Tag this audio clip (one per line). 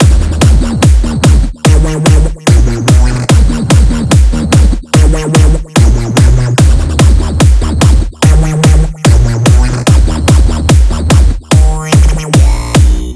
sample
techno
electronic
synth